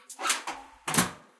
1970 VW Bug trunk lid 3
Opening and closing the engine compartment lid.
hinge, Bug, close, trunk, VW, open, old, lid, bonnet, car